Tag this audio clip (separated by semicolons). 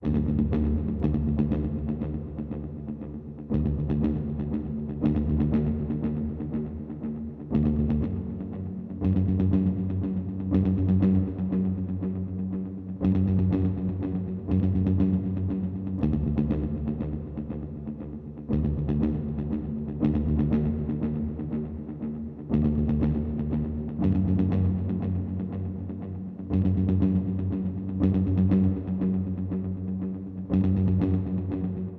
action; foreboding; mysterious; mystery; Ominous; pulsating; strings; suspense; Suspenseful; swelling; tense; tension; thriller